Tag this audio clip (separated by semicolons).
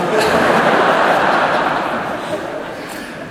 audience; auditorium; chuckle; concert-hall; crowd; group; large; laugh; laughing; people